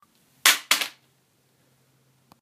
Drop Tablet
Sound of a computer tablet dropping on floor.
computer-tablet-drop, ibook-drop, tablet-drop